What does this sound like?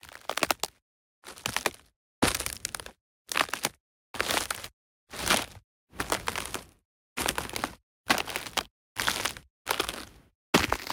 steps on a wood branch - actions
Some Fieldrecordings i did during my holidays in sweden
Its already edited. You only have to cut the samples on your own.
For professional Sounddesign/Foley just hit me up.
twig, tree, wood, crack, walking, Field-recording, crunch, breaking, twigs, break, steps, pop, branch, snap, snapping, stick